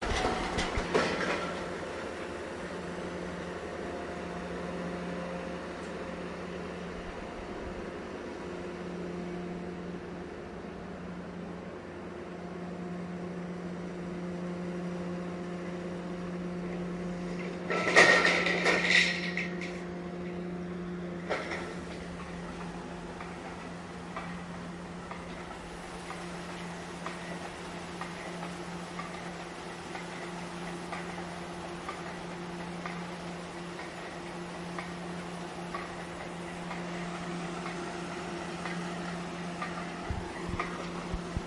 Construction part 3
Construction sight ambiance.